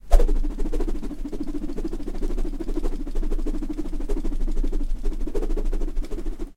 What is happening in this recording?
Flying Blade
The sound of a piece of bamboo being moved quickly with proximity effect, resembling a blade flying through the air. Recorded using a cheap condenser microphone through a Focusrite Saffire 24 DSP.
whoosh, flying, knife, blade